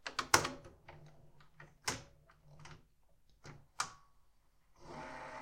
18 FAST FORWARD START
Recording of a Panasonic NV-J30HQ VCR.